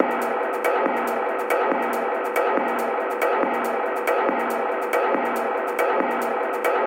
Space Tunnel 8
loop, processed